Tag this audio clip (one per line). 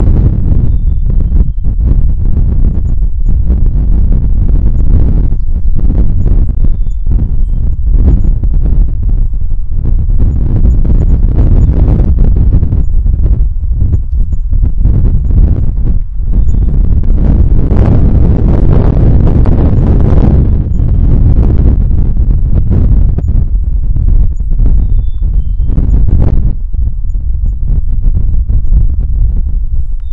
birds
distortion
wind